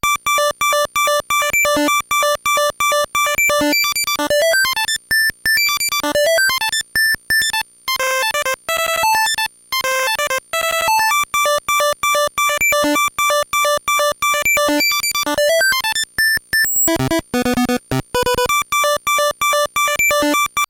Warning Sign
drumloops, glitch, 8bit, nanoloop, gameboy, videogame, cheap, chiptunes